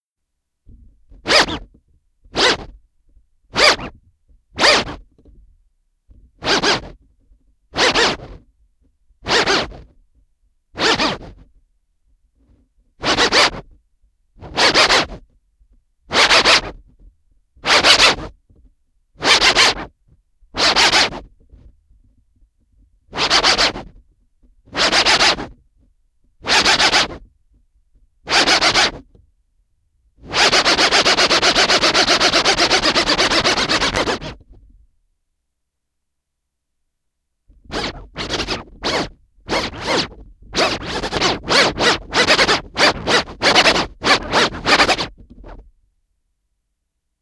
Simple vinyl record scratches using a turntable.